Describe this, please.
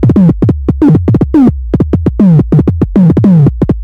ARP 2600 Nervous Kicks
Samples recorded from an ARP 2600 synth.
More Infos: